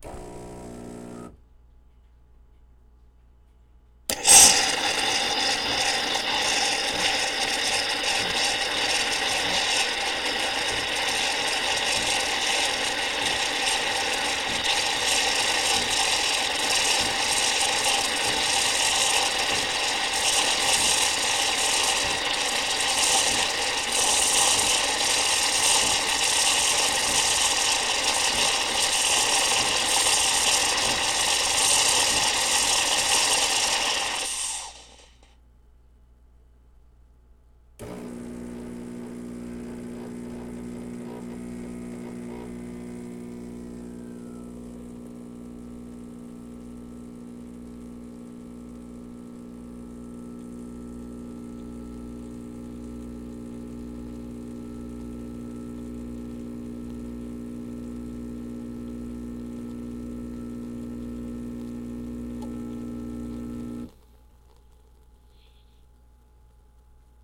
Cappucino coffee machine
Cappuccino machine froths / steams milk then pours a shot of espresso.
espresso, milk, froth, frothing, machine, Cappuccino, steam, coffee